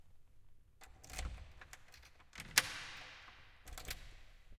1 Unlocking door
school, unlocking